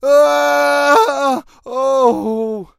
facet jeczy - guy moans 01
guy moans, mic - studio projects b1
groan, groans, undead